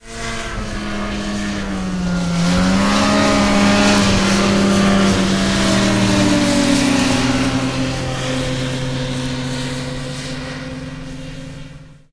A group of snowmobiles pass on a trail approximately 100 feet away.